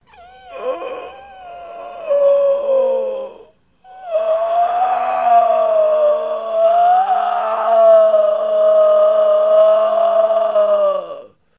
Two-tone zombie moan. No effects used. The trick is to push the back of your tongue to the wall of your throat to get the whistle/strangled effect.
horror, zombie, moan, undead, freaky, evil, sound-fx, creepy